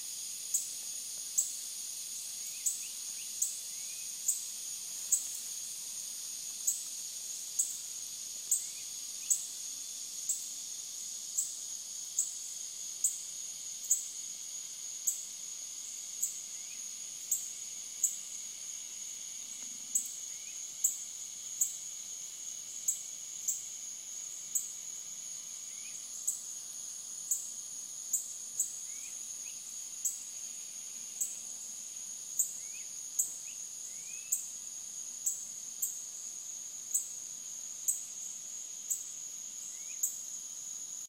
Cicada Insects 8 26 13 8 06 PM
Short field recordings made with my iPhone in August 2013 while visiting family on one of the many small residential islands located in Beaufort, South Carolina (of Forrest Gump, The Prince of Tides, The Big Chill, and The Great Santini fame for any movie buffs out there).
Beaufort, birds, cicadas, crickets, day, field-recording, forest, frogs, hot, humid, insect, insects, jungle, low-country, nature, night, semi-tropical, South-Carolina, summer, tropical, USA